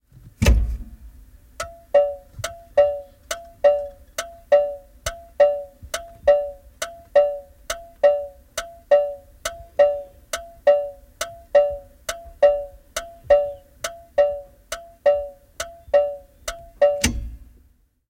Henkilöauto, suuntavilkku, suuntamerkki / A car, indicator, turn signal, interior, Cadillac
Cadillac, avoauto. Suuntavilkku auton sisällä, soiva ääni. Lähiääni.
Paikka/Place: Ei tietoa / Unknown
Aika/Date: 1984
Yle, Autoilu, Cars, Finnish-Broadcasting-Company, Finland, Suomi, Tehosteet, Soundfx, Autot, Yleisradio, Auto, Motoring